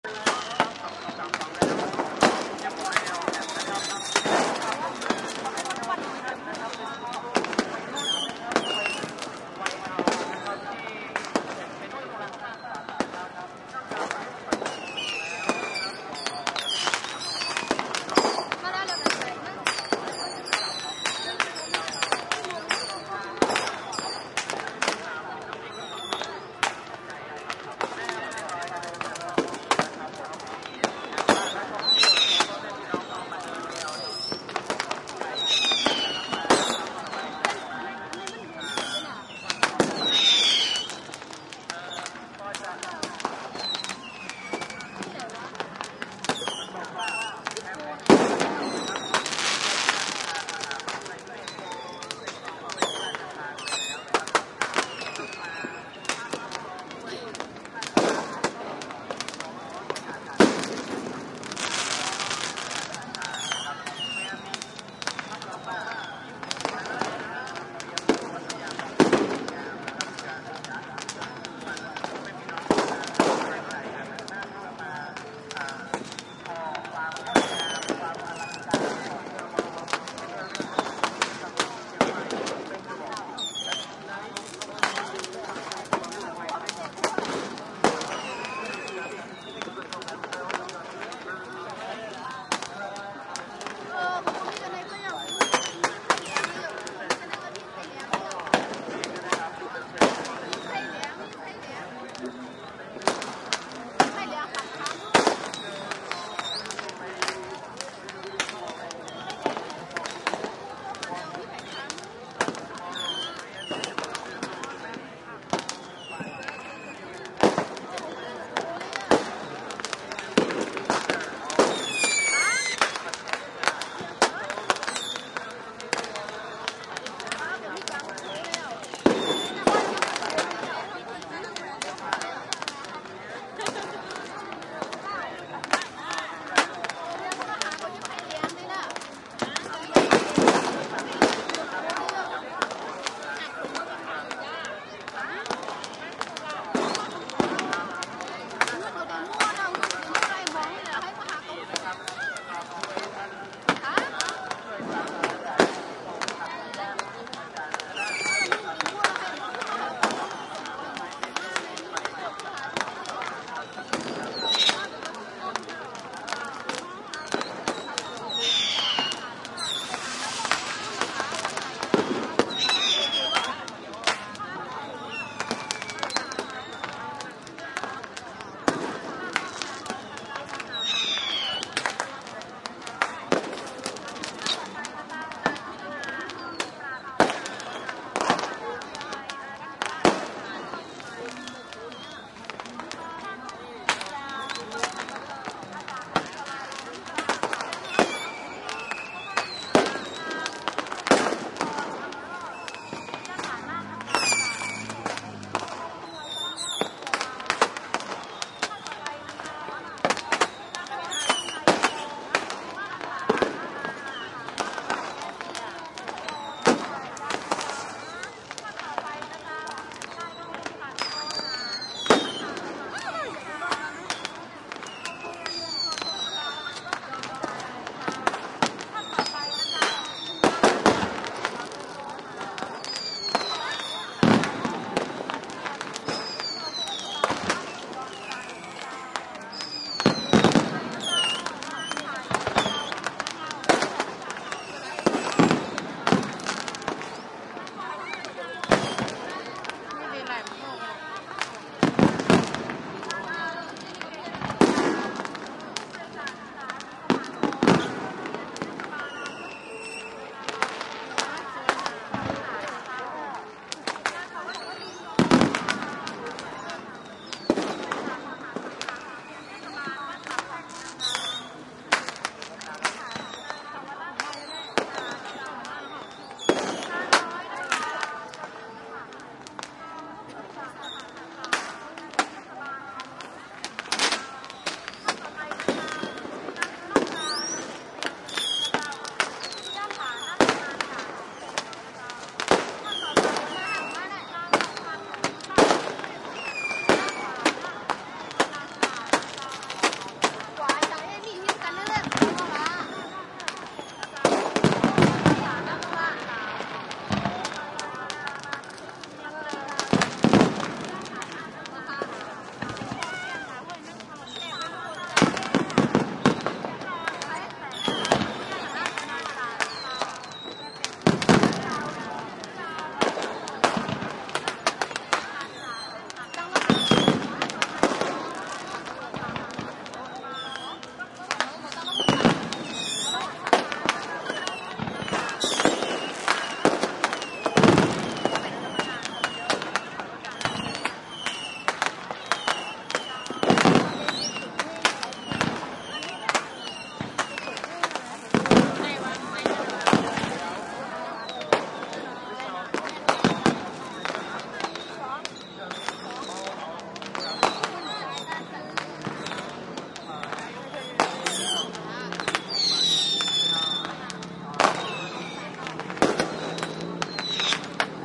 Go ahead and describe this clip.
Thailand fireworks and firecrackers close and far from bridge Loi Krathong lantern festival Chiang Mai +people3 closer voices crowd, distant PA voice near start
Thailand fireworks and firecrackers close and far from bridge Loi Krathong lantern festival Chiang Mai +people closer voices crowd, distant PA voice near start